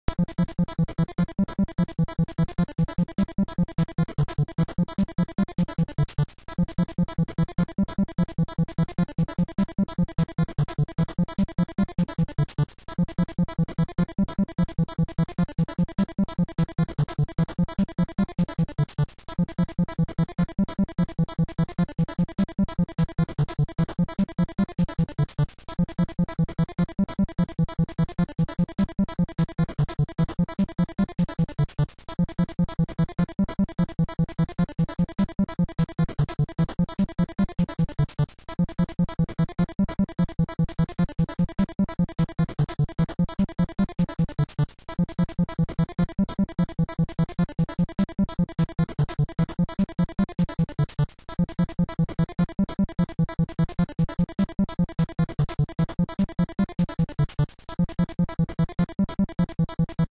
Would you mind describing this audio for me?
Chase 8-Bit
This is my next 8-Bit-Chasing sound made with FamiTracker. This Sound can be used in 8-Bit games, or just for listening (Not for too long).